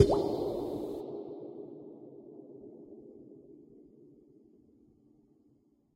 Tunnel Drip Hit
Low pitched drip recorded with binaural mics processed through a convolution reverb loaded with transaurally decoded binaural impulse made in a railway tunnel
drip, reverb